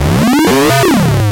Video Game Leap or Power Up
Leap/Power Up sound
CMOS, modular, digital, element, retro, video, production, Noisemaker, synth, game